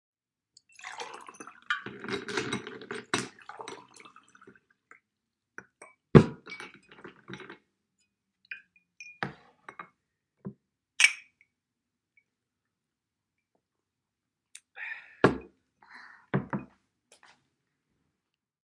poruing water and putting ice
We poured some water and put ice and then drank the water at home to make it sound like a pub.
Bernadette Lara Kasar
bar, drink, glass, ice, pouring, pub, water